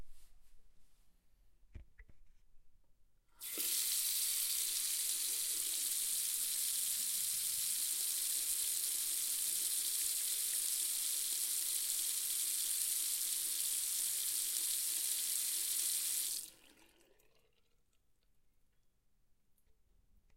Tap turning on/off
Bathroom tap turning on and off.
bathroom, drain, running, sink, tap, water